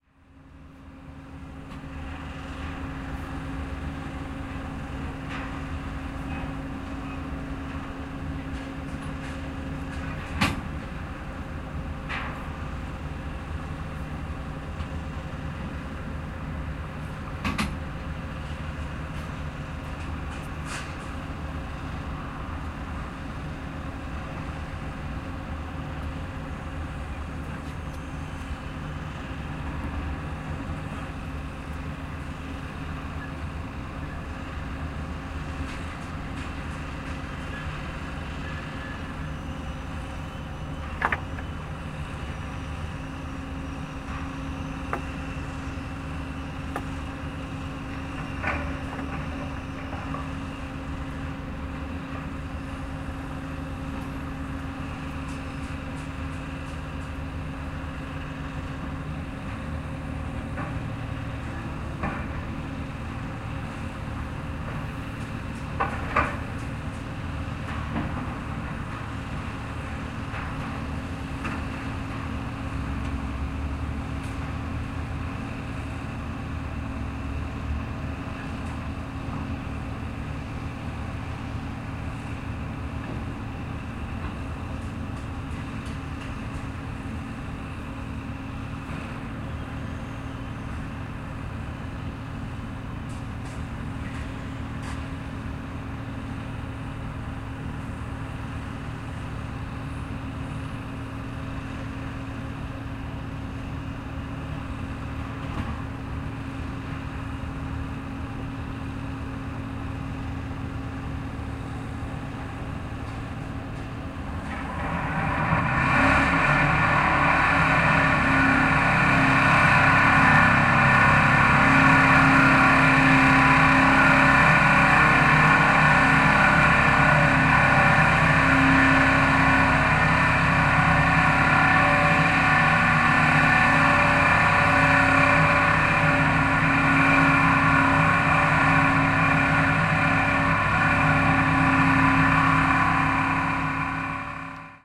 110817-river port ambience in randers
17.08.2011: eighteenth day of ethnographic research about truck drivers culture. Renders in Denmark. The river port ambience: noise of drill, some machine swoosh. At some moment noisy piledriver runs.
piledriver, forklift, ambience